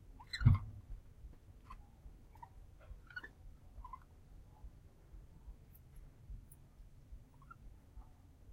Cute sound but hard record sound of rats (chuột xạ) at night. ≈2013.10 Zoom H1
animal, chu, cute, rat